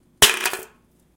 Can impact on cement. Microphone used was a zoom H4n portable recorder in stereo.
ambient, field-recording